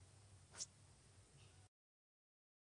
fósforo que se prende para dar fuego a algo
encender, f, fuego, sforo